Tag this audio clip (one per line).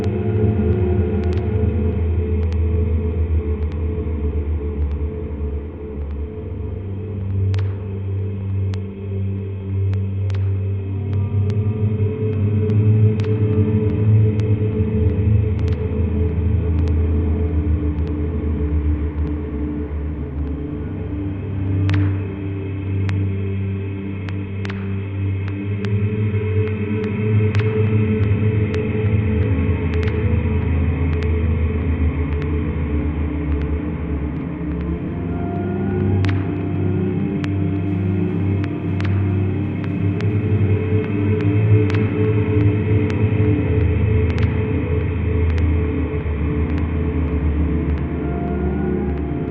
creepy
dark
echo
eerie
glitch
singing
spooky
vocal
voice